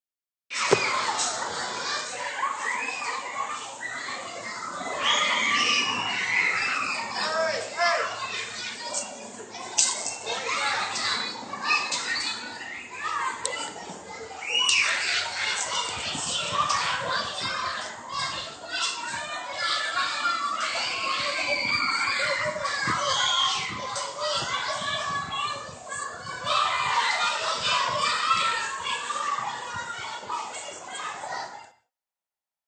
Kids at school on the playground during recess. Recorded with and Android cell phone and mixed in Garageband.